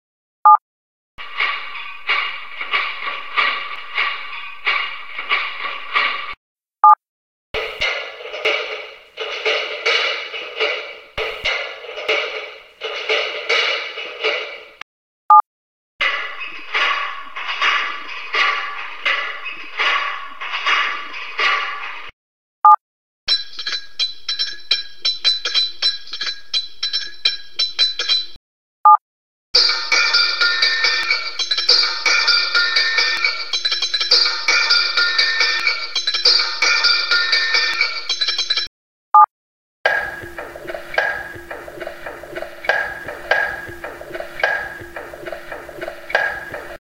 Garage Percussion Loops
Several loops and cuts from a recording of me making noise in my garage. Here's an idea of what's in my garage: For the percussion section of my garage orchestra, I have two of those really old-fashion metal garbage cans, with lids (together they make excellent drums;), about 10 steak skewers - which are fun to drop on the trashcan lids - , yard sticks and brooms. For the woodwinds, PVC pipes, a few short metal pipes. For the strings I have some cables to tie up then pluck, scrape and hit. That's about all... :)
noisy, industrial, lo-fi, percussive, loop, drums